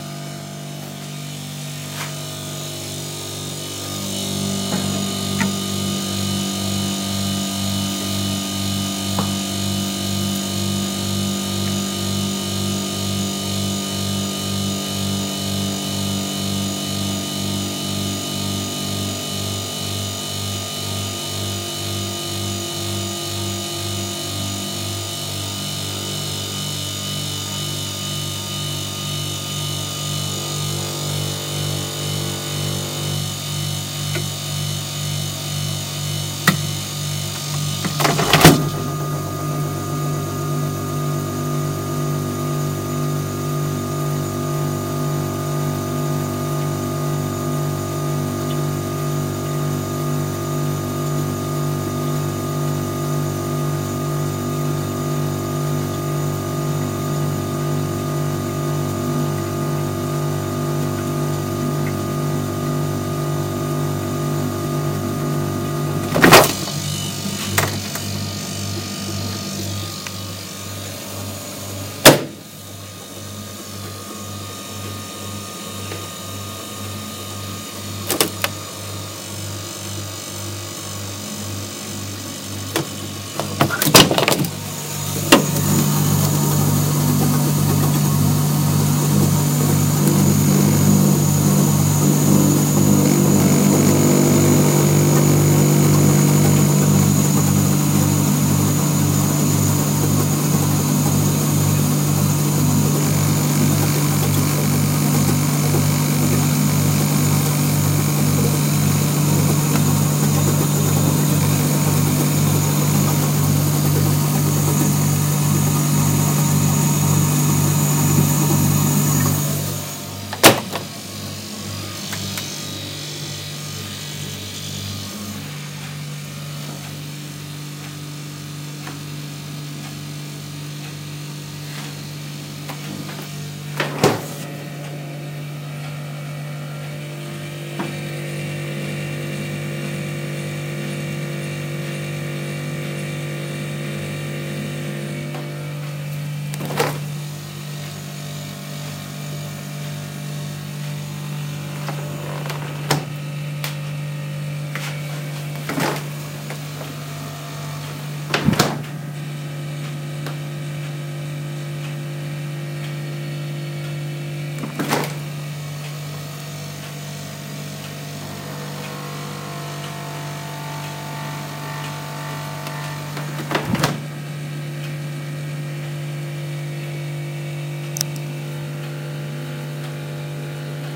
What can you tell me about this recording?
Old fridge vibrating motor sound.